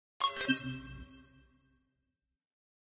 HUD-CLOSE01
beep, bleep, blip, click, event, game, hud, sfx, startup